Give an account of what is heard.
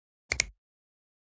10.24.16: A natural-sounding stereo composition a snap with two hands. Part of my 'snaps' pack.